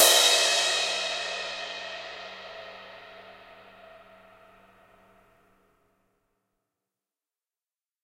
Skiba18Wash
A custom-made 18 inch crash/ride cymbal created by master cymbal smith Mike Skiba. Recorded with stereo PZM mics. The bow and wash samples are meant to be layered together to create different velocity strikes.
cymbal, drums, stereo